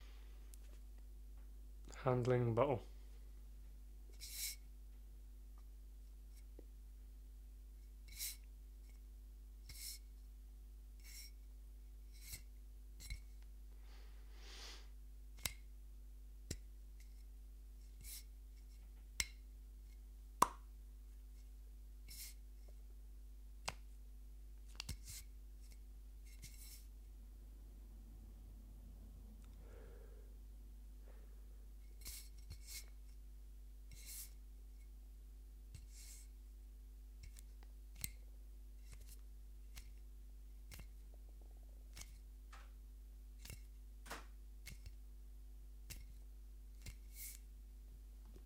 Handling Bottle
Some handling sounds of a glass bottle. Recorded with an ME66 into a Tascam DR40.
bottle, foley, glass, glasses, handle-bottle, handling, wine-bottle